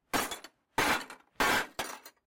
Breaking Glass Window
glass window breaking
breaking, window